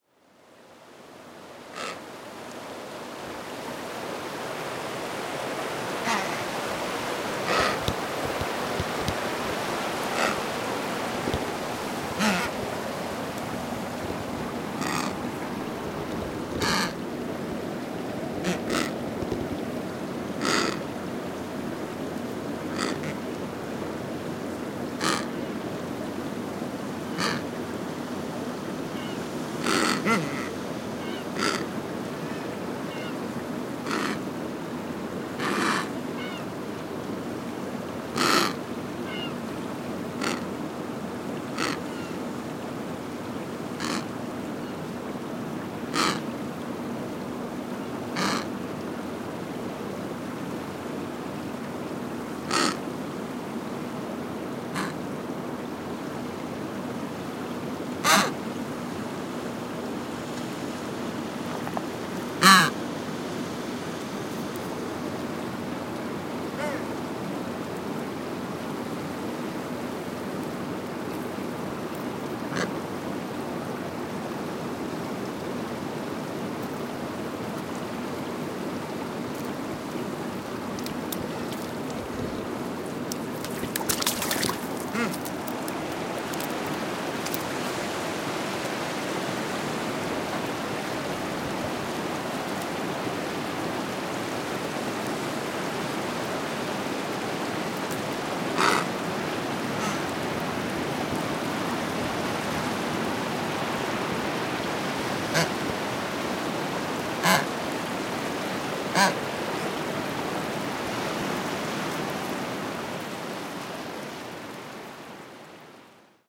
Sound of a Penguin at Brown Bluff - Antarctica Peninsula, recorded with Shotgun Microphone (Schoeps)
Brown, Antarctica, Field-Recording, Penguin, Peninsula, Bluff